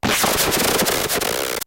an angry synthesized dog and cat going at it.
TwEak the Mods